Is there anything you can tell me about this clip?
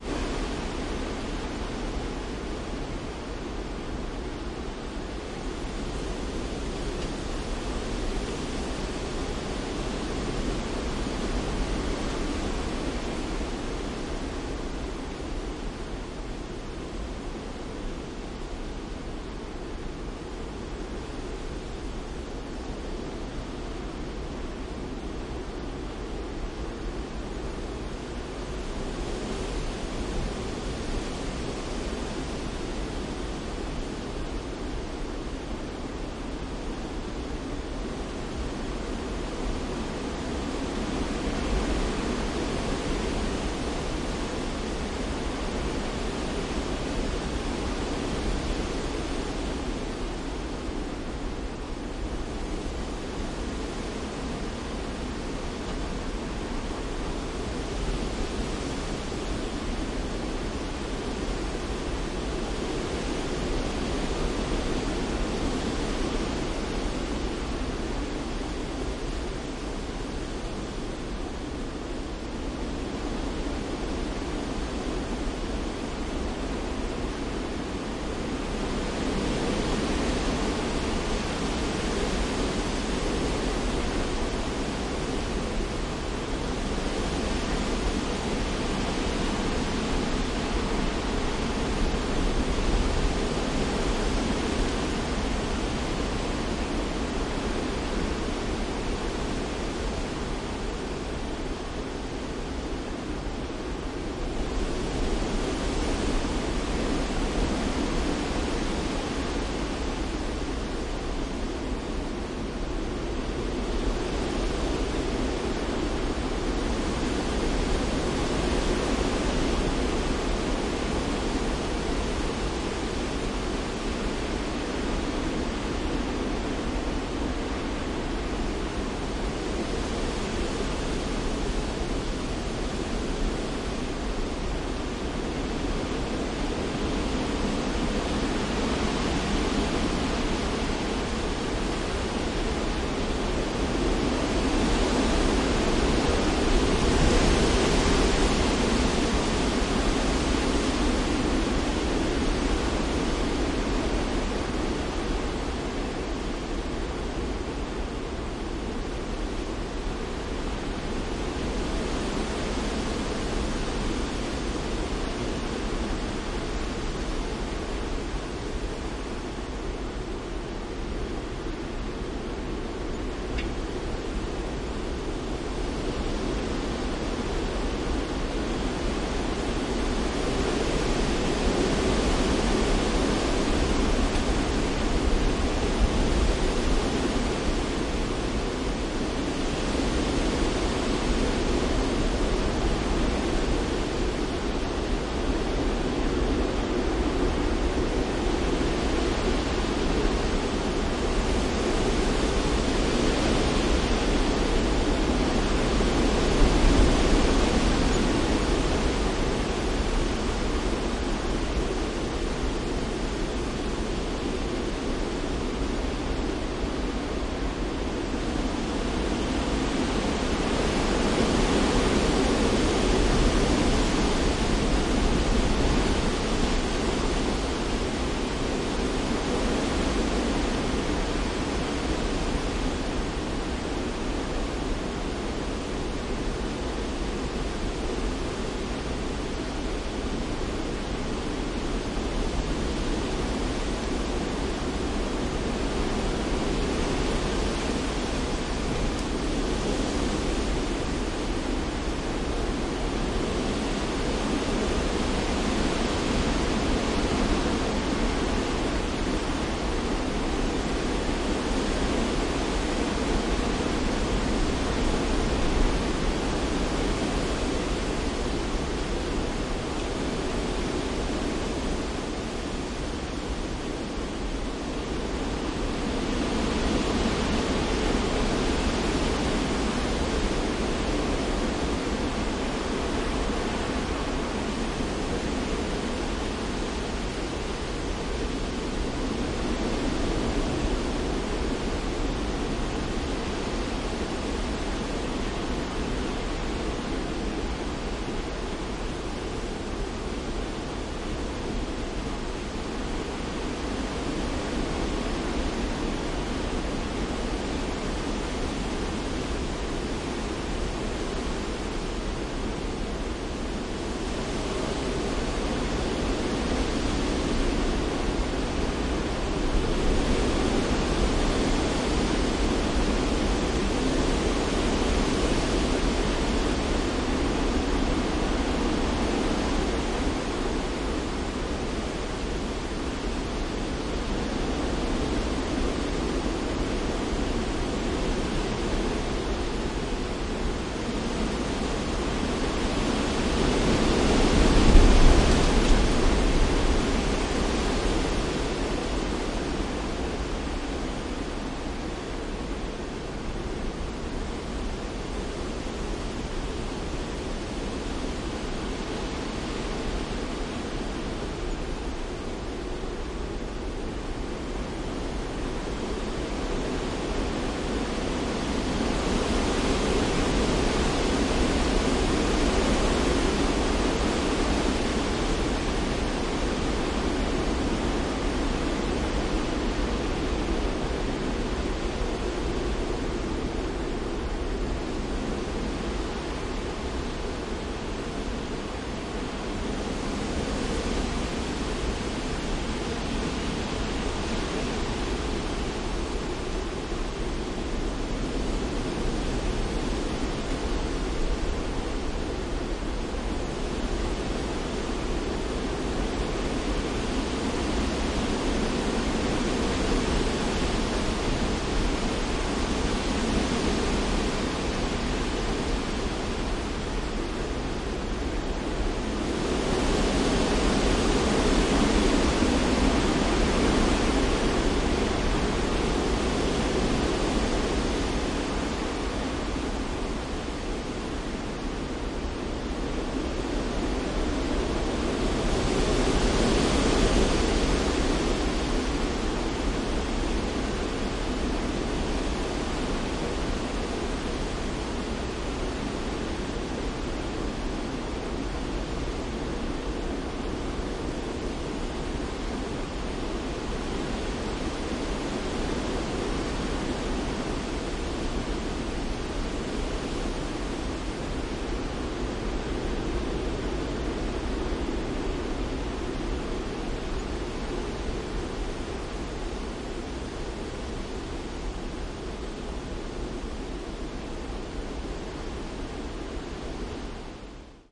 windy wood 2
Autumn gale of 27/10/2013, UK. Waiting for the storm that passed me by.
Recorded in garden adjoining deciduous woods in leaf(front and above). Wind from SW (right back). DIY Dummy head binaural recording (6 mic version). Quad Capure to PC. Large dead cat windshield, and sheltered by the house, (back) and wall (right). Full frequency range used on this version (down to 20Hz).
Loses quite a lot of detail when played with the built-in player's lossy compression.
Best dowloaded, and played very loudly using headphones.
DIY 3D binaural '6 mic' dummy head, Quad Capture, PC.
woods, trees, diffuse-sound-object, binaural-nature-recording, binaural, storm, dummy-head, gusts, binaural-imaging, nature, gale, headphones, field-recording, wind, 3d